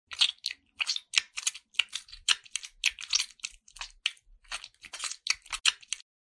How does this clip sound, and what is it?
Squelching Noises
Made with soap.
squish, wet, squelch, slime, sticky